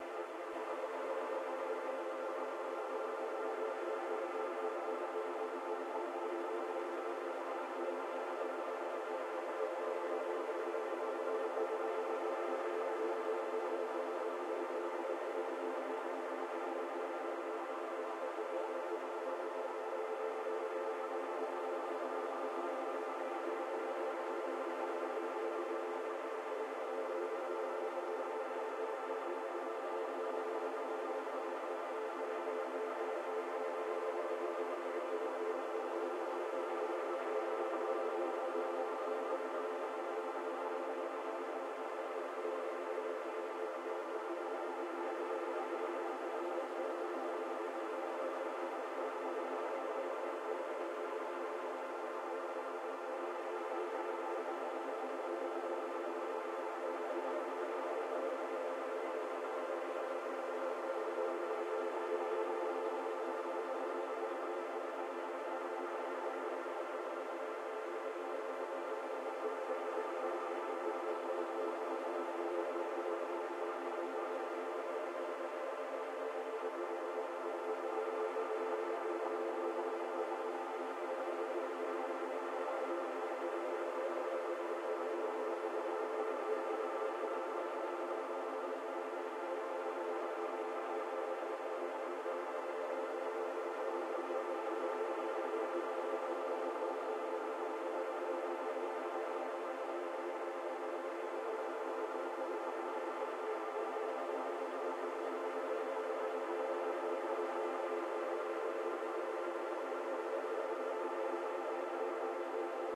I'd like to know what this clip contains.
Fmaj-calm2
Pad, created for my album "Life in the Troposphere".
pad, ambient